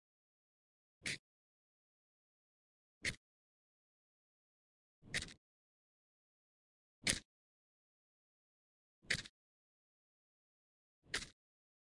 11 - Match strike
Czech, CZ, Panska, Pansk